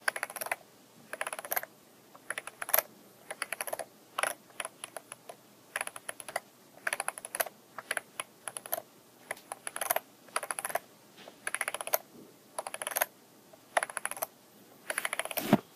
Slowly using the scrollwheel on an old mouse
Someone scrolling down a webpage or Word doc, or whatever!